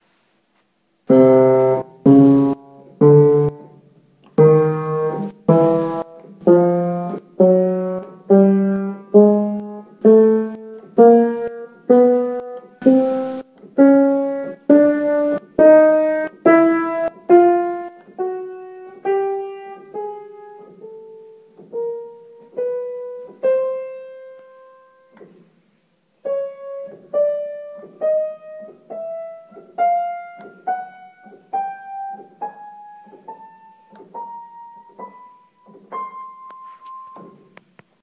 Piano sounds - individual keys as named